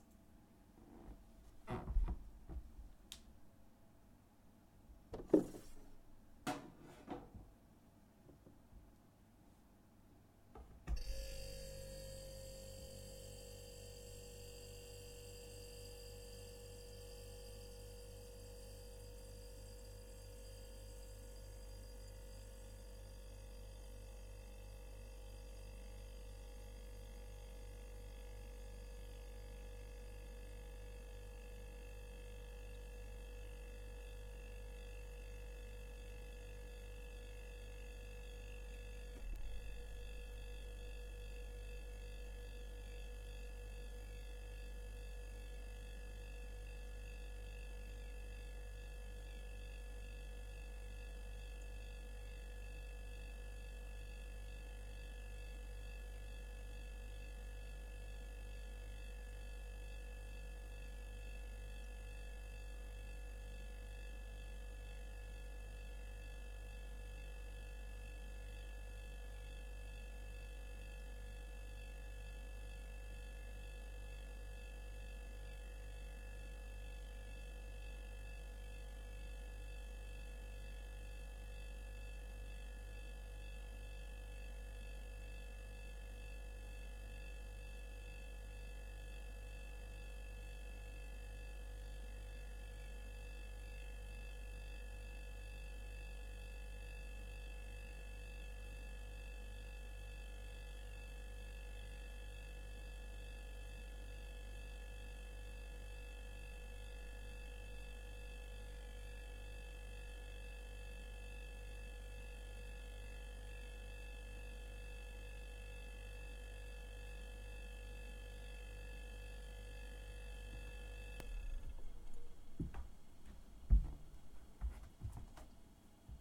Little japanese fridge.